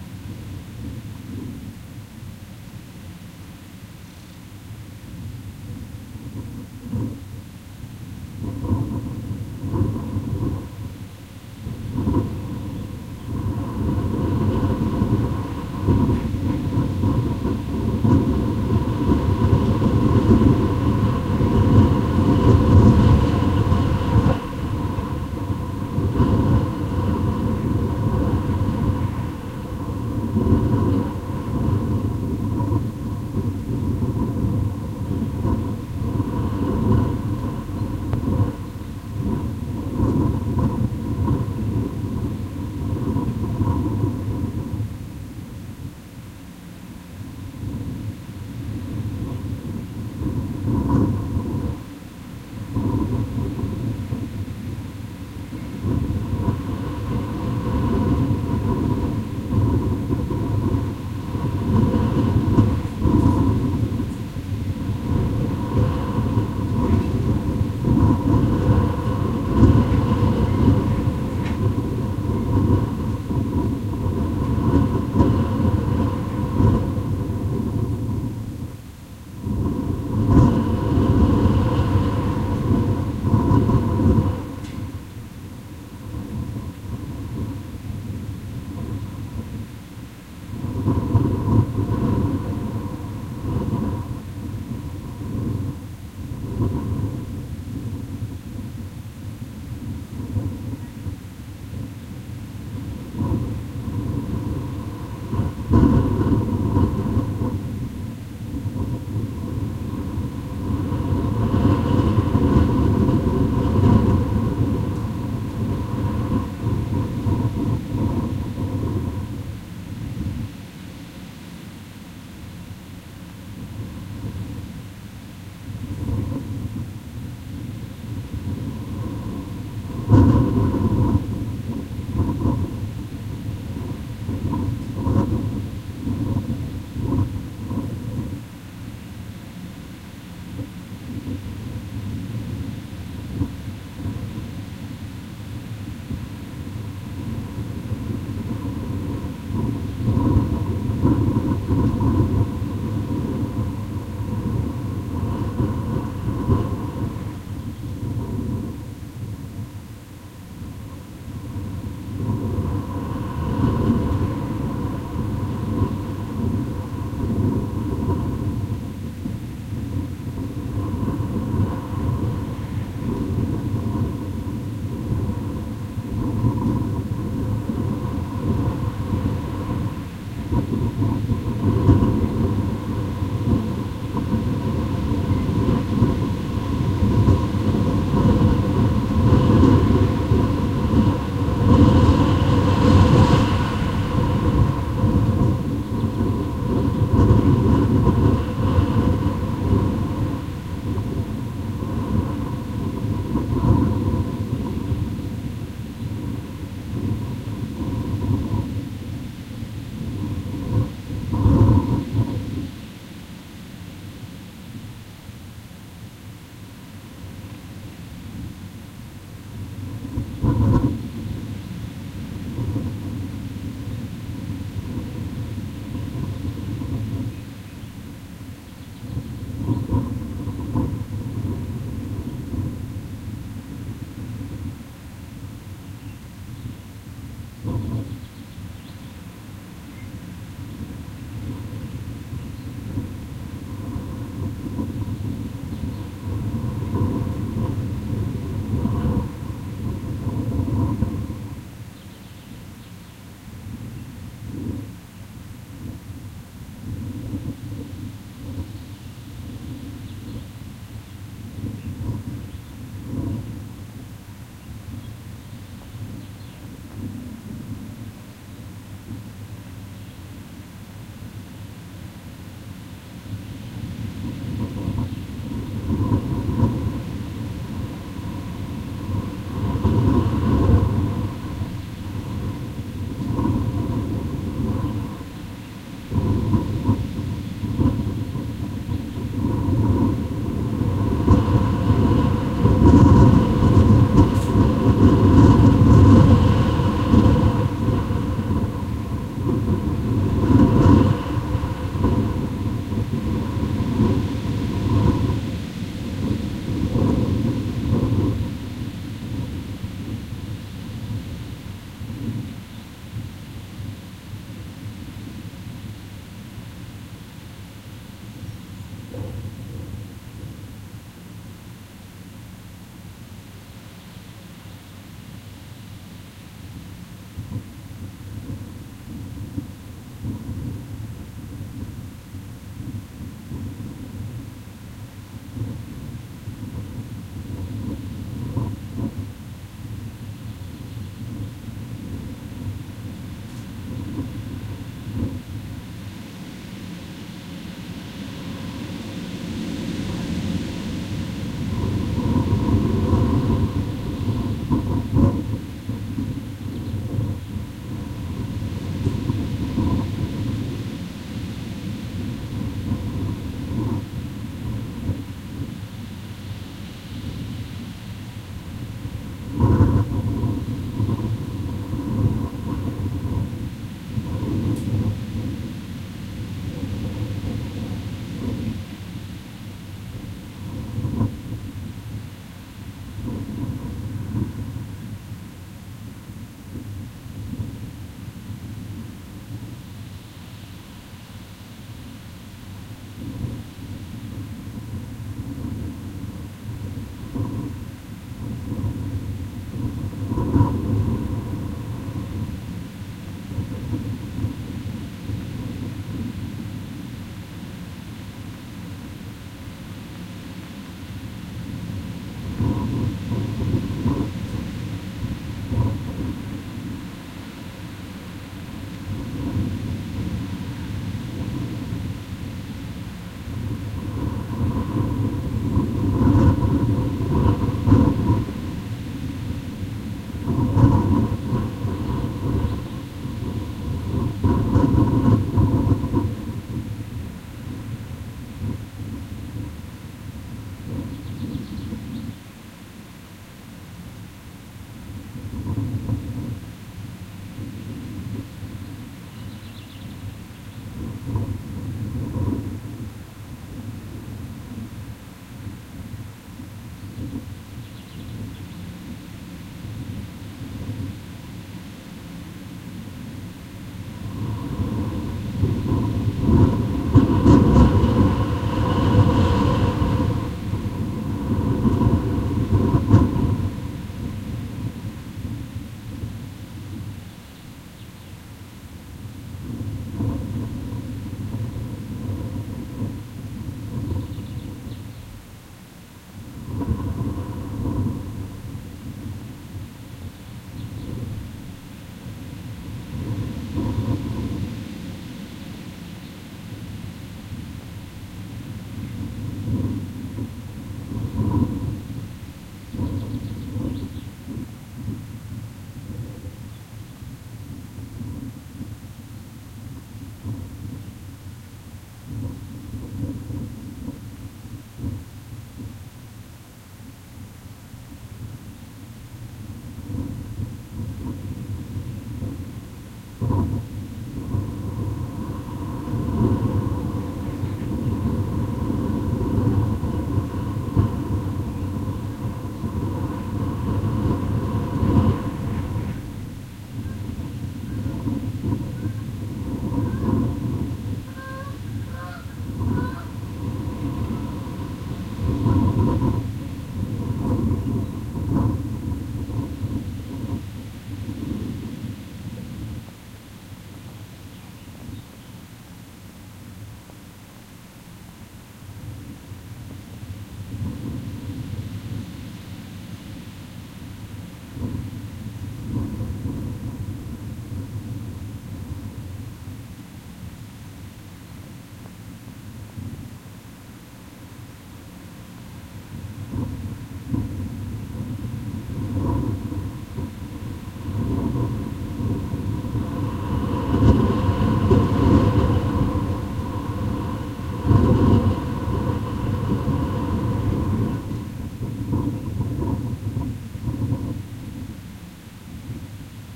Wind On Vent
A stereo recording of a strong wind blowing on a closed kitchen extractor fan vent. Rode NT4 > FEL battery pre-amp > Zoom H2 line in.